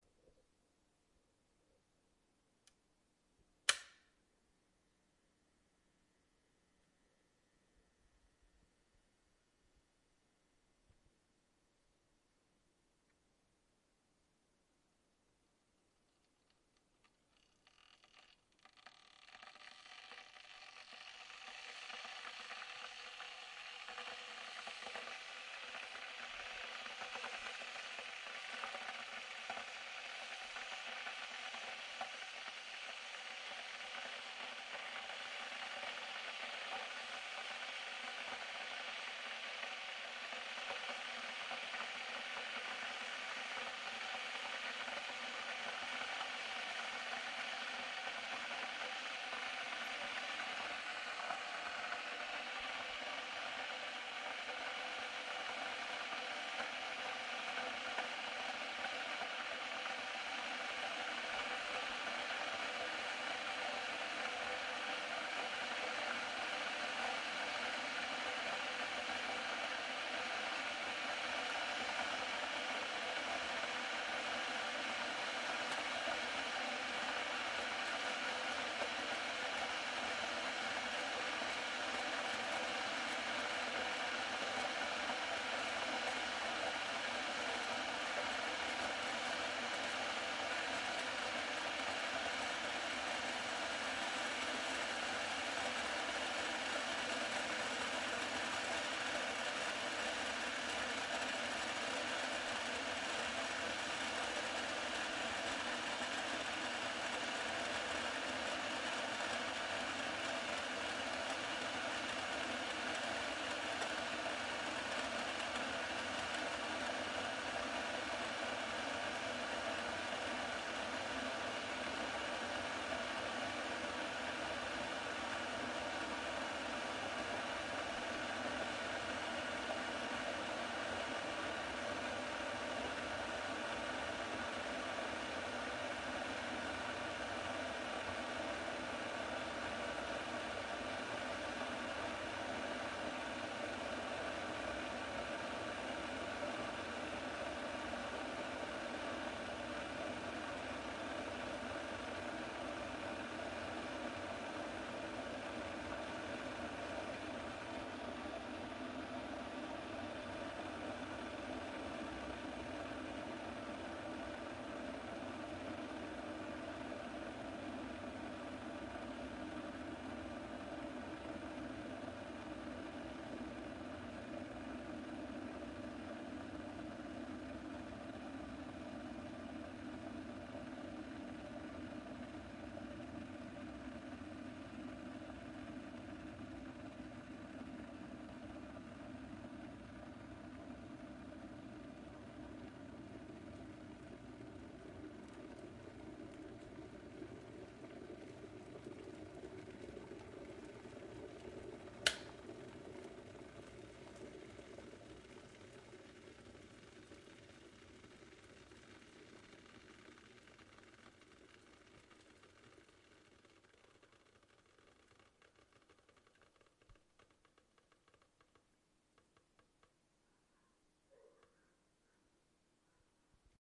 Electric water boiler redone
A re-recording of the electric water boiler. I love the bubbles at the end.
Recorded October 22nd 2018
water, bubbling, heat, boil, bubble, boiling, liquid, bubbles